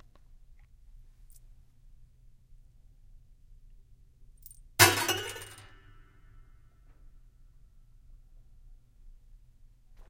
thumbtack strike on muted piano strings
thumbtack strike on piano strings
metal, piano, tack